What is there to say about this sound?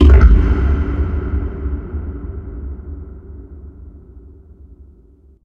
A droplet of dark matter